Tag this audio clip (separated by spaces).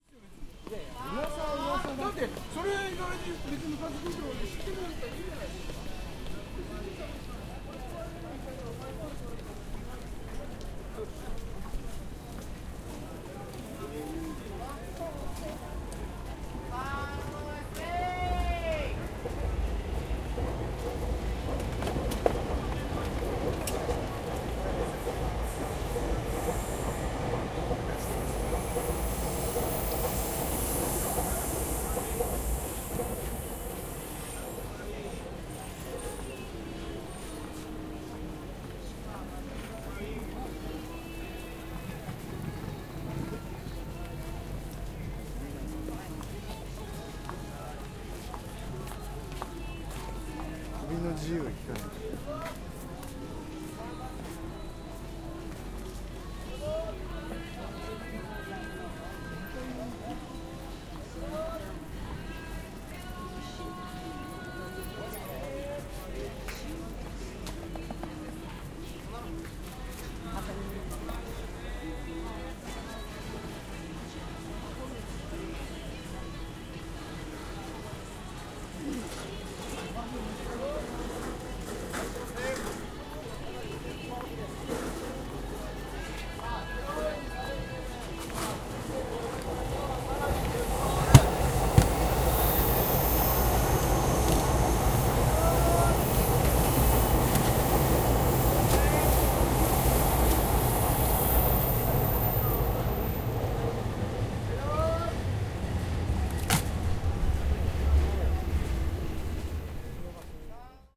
japanese japan subway field-recording tokyo seller